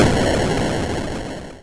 Retro, Explosion 02
Retro, explosion!
This sound can for example be triggered when a target is destroyed - you name it!
If you enjoyed the sound, please STAR, COMMENT, SPREAD THE WORD!🗣 It really helps!
no strings attached, credit is NOT necessary 💙
Blow, Blow-Up, Bomb, Boom, Death, Demolish, Destroy, Destruct, Detonate, Explode, Explosion, Game, Retro, Up